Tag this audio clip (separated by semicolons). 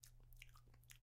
lip; trumpet